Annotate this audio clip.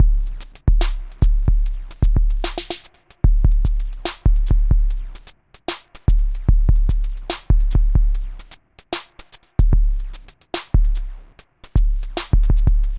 uncl-fonk 3
Electro funk drum-machine 148 bpm